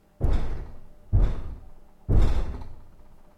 Crockery Shaker 01a x3
All of the crockery being shaken by builders (with sledgehammers) demolishing an old conservatory next door.